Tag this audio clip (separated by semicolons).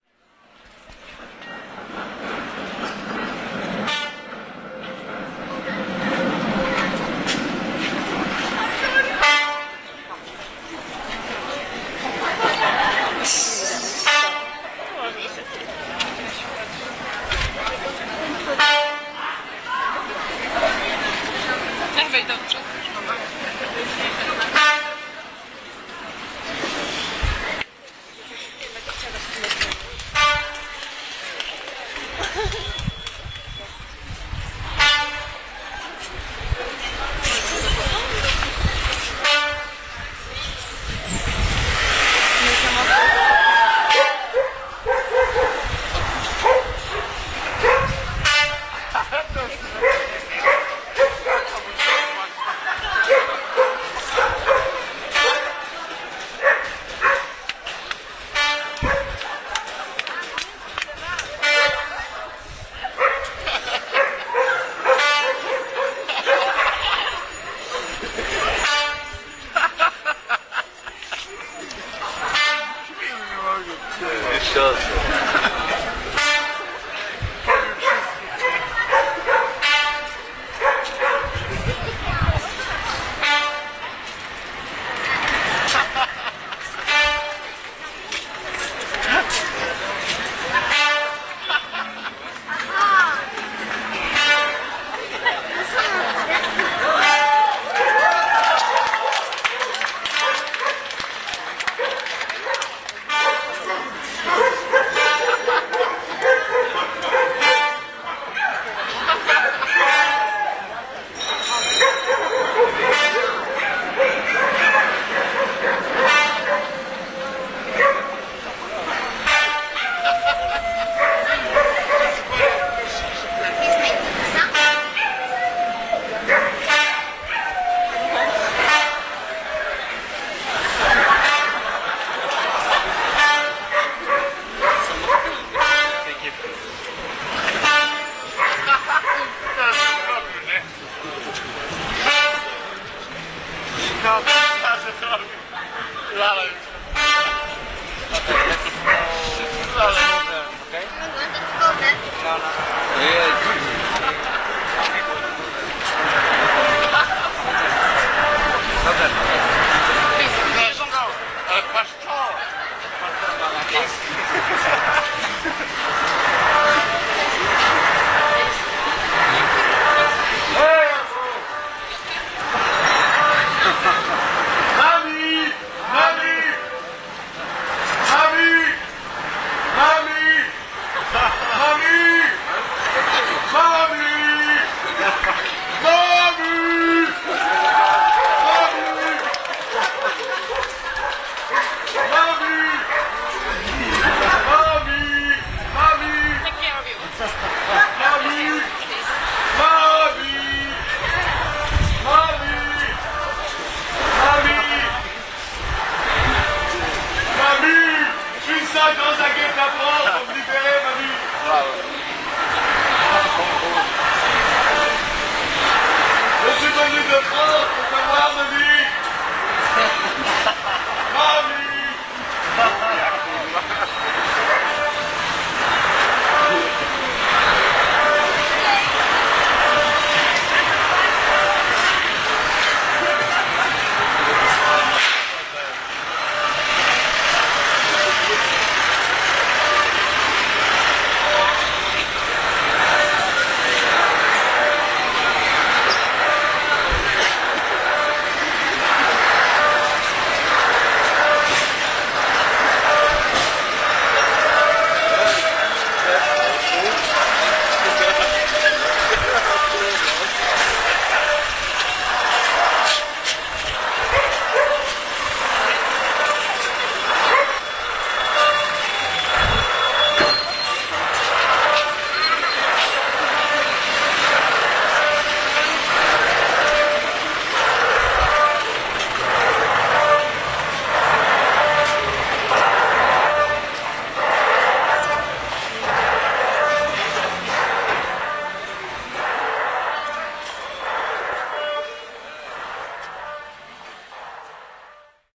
jeanne; street; festival; poznan; simone; crowd; performance; poland; malta; theatre